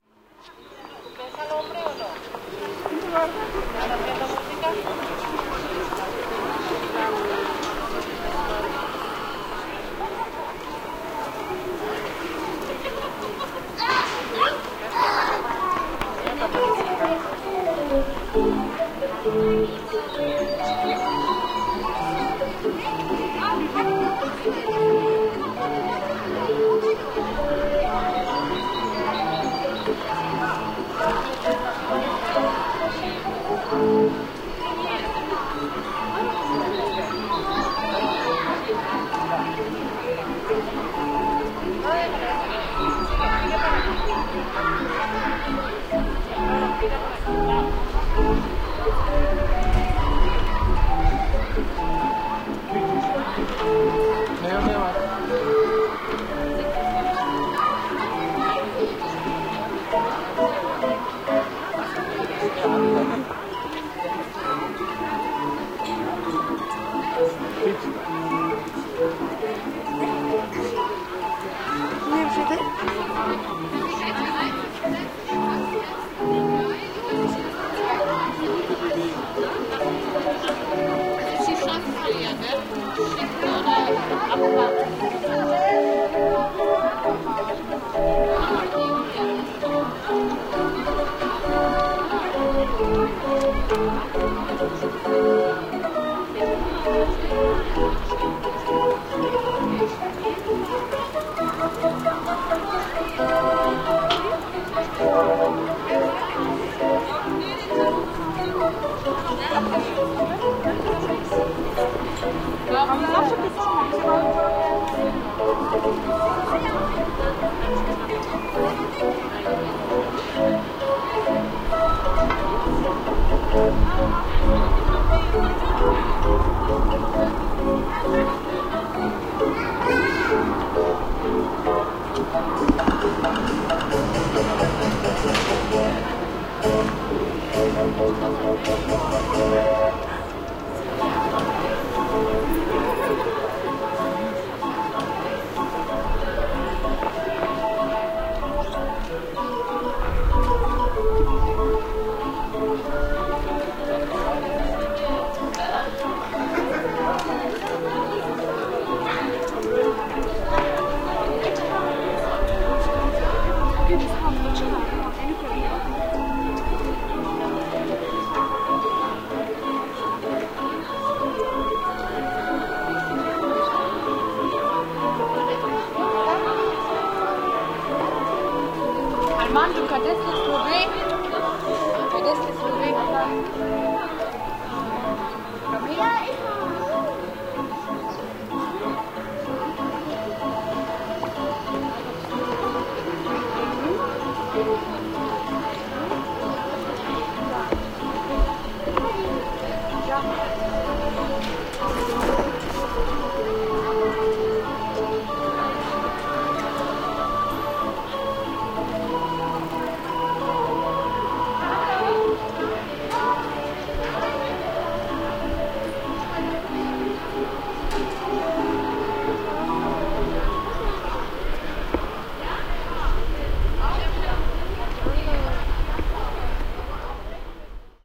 Atmo Offenbach - Barrel Organ on Frankfurter Straße
..it's March. A barrel organ player is standing in the pedestrian precinct. People walk by. Some stop to watch and listen. From time to time some kids run across shouting and laughing.
Recorded on my Zoom in stereo, live and on location in Offenbach am Main.
precinct, urban, Strassenatmo, Drehorgel, hand-organ, street, Drehleier, Atmo, backround, Fussgaengerzone, pedestrian, shopping, barrel-organ, Einkaufsstrasse, Stadtatmo, city, field-recording